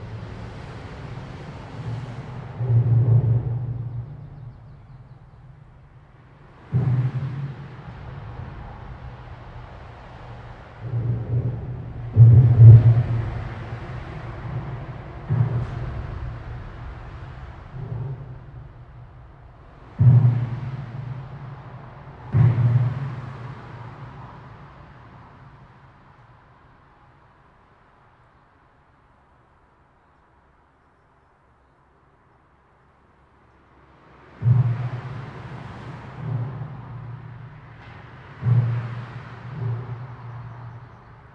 140618 A38Underpass Joint F
4ch field recording of an underpass below a German motorway, the A38 by Leipzig.
The recorder is located directly beneath an expansion joint on the edge of the underpass, the clonks and clanks of cars and trucks driving over the joint can clearly be heard, with the motorway noise reverberating in the underpass in the background.
Recorded with a Zoom H2 with a Rycote windscreen, mounted on a boom pole.
These are the FRONT channels, mics set to 90° dispersion.